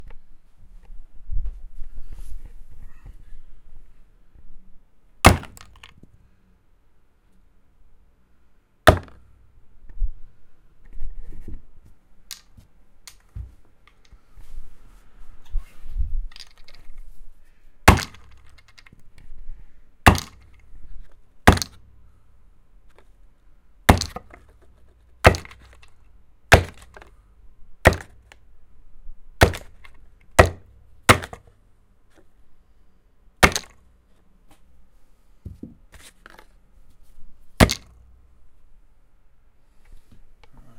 This is a quick sound for any who needs that court room gavel hammer hit